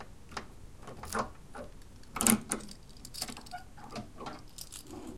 I am unlocking keyed padlock, inserting key, then turning it and removing 'n' bar.